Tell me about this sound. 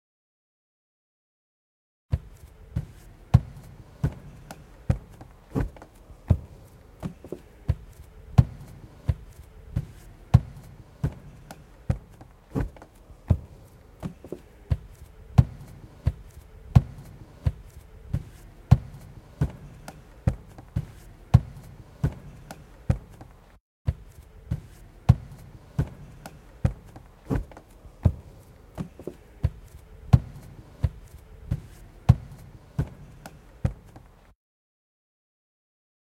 Footstep Loop
Sound Record in a church natural reverb,
by, Phil, walking, Victor, feet, foot, steps, wood, Pirooz